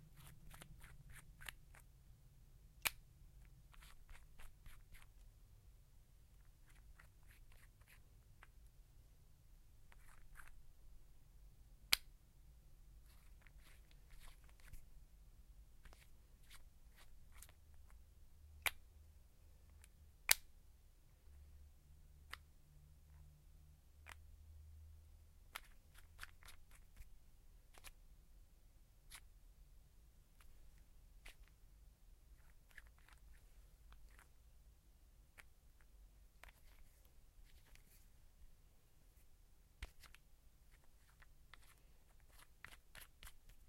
Screwing the cap on and off of a nail polish bottle.